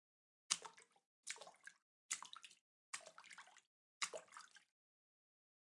A collection of 5 short splashes, made on a kitchen sink. A towel was used to absorb the acoustics of the metallic sink. Recorded with AKG c414